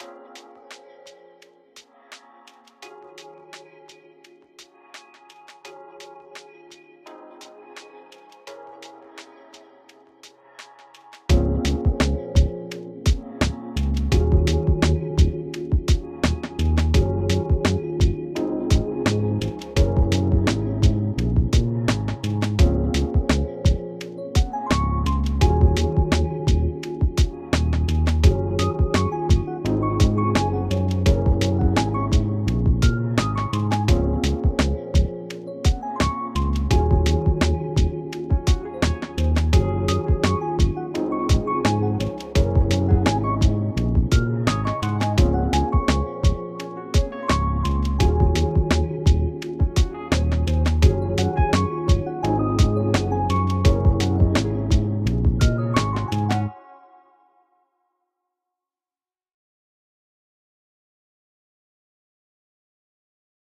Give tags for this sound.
Sound-Design Podcast Loop atmosphere Transition Ambient Ambiance

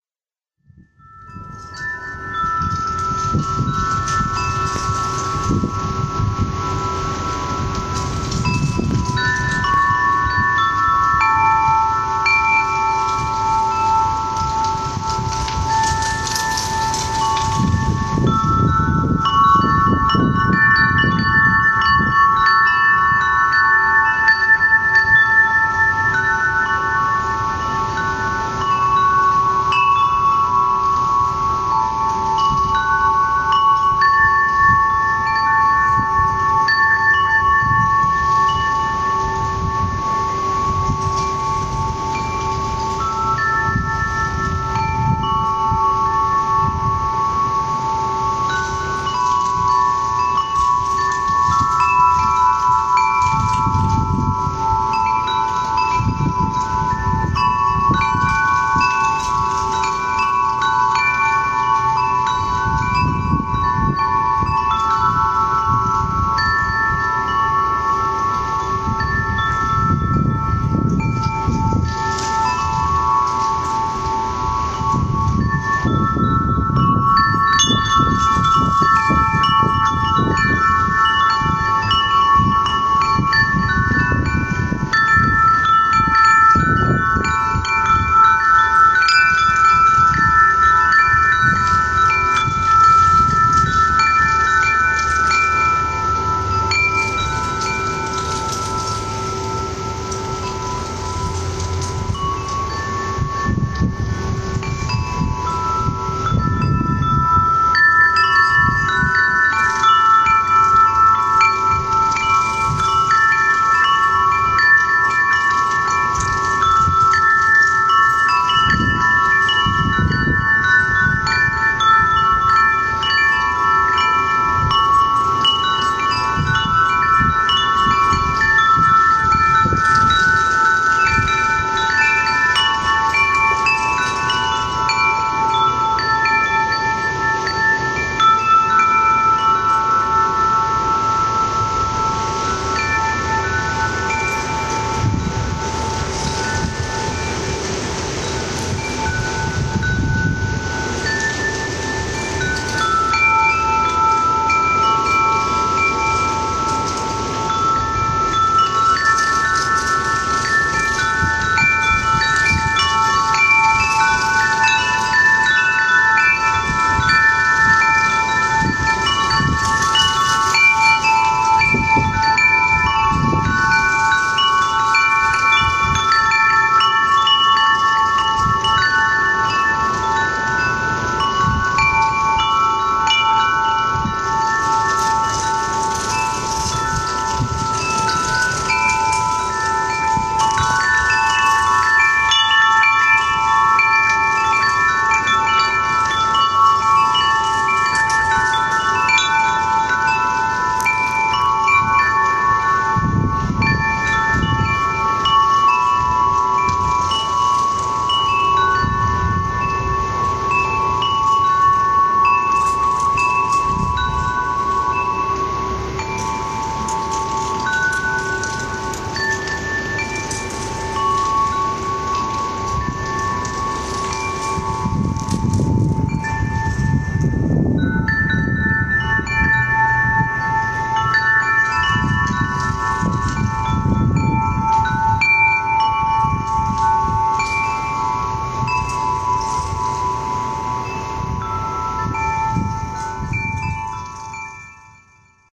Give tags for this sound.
chimes cold Lincoln Lincoln-Nebraska Nebraska wind wind-chimes windy

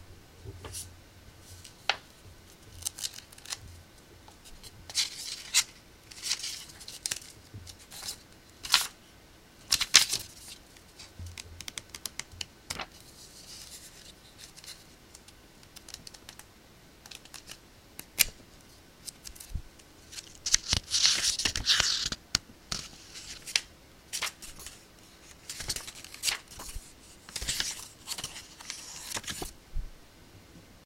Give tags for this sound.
fingering paper

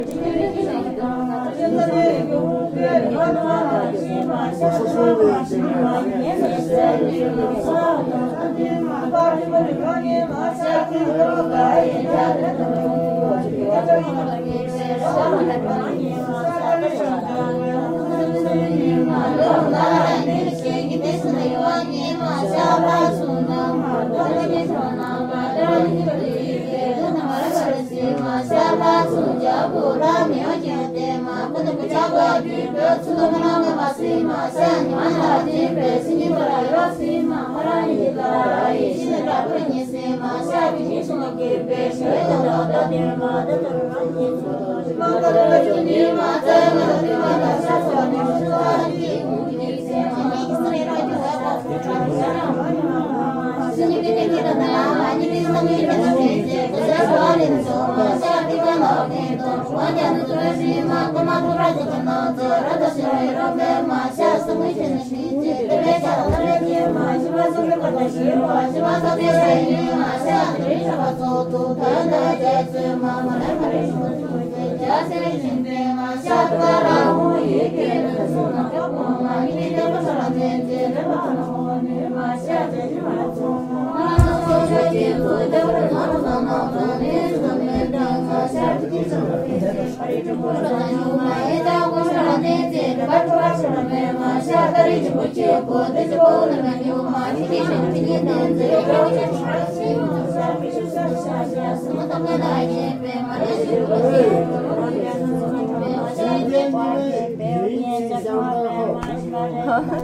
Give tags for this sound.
buddhist chant mantra prayer tibet

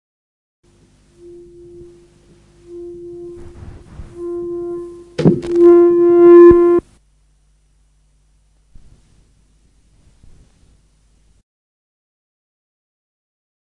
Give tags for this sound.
reaction coupling mike back feedback microphone

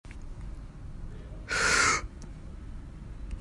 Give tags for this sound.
air gasp shock

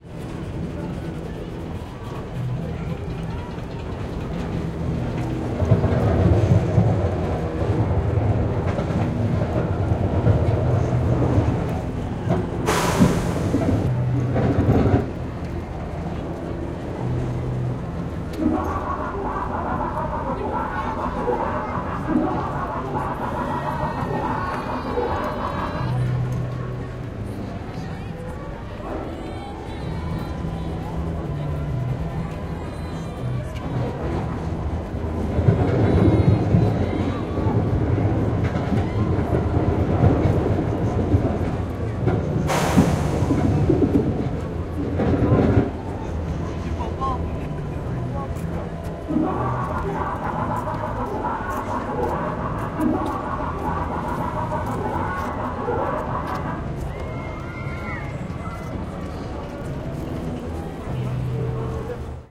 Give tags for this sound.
field-recording Moscow ambience

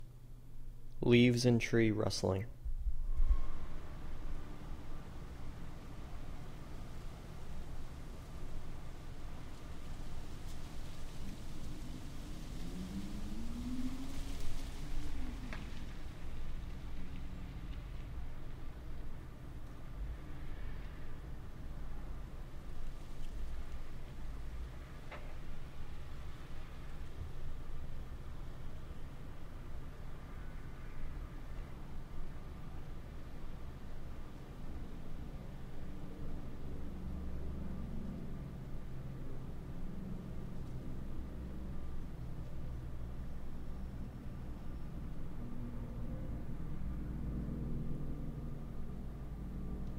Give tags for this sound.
mono ambient

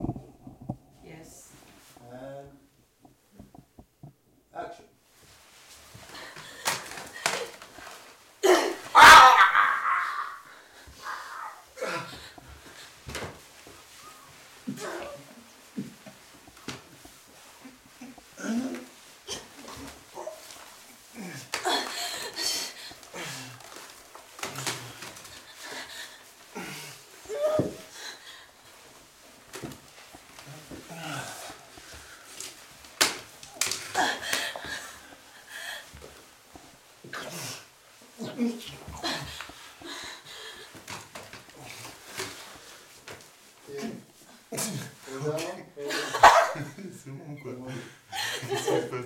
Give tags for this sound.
fight Film Movie screams

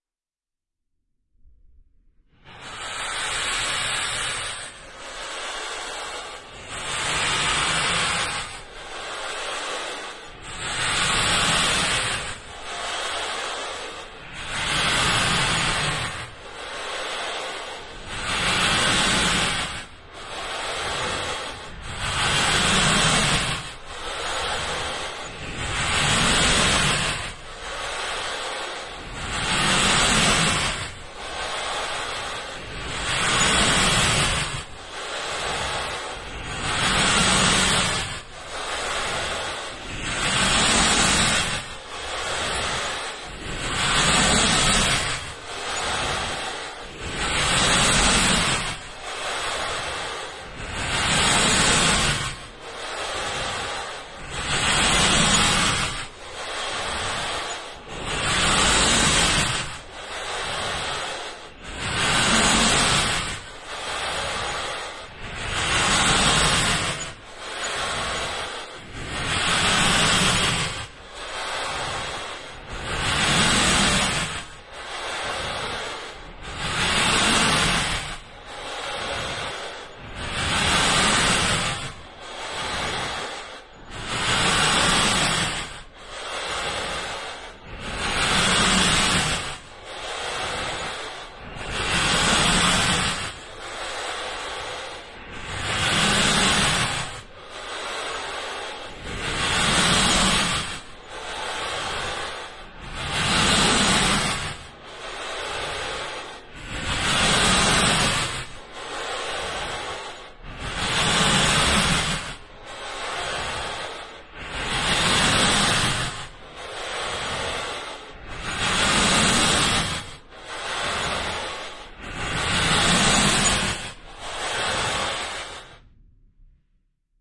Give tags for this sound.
mask breathing sinister ba breathing-apparatus gas-mask

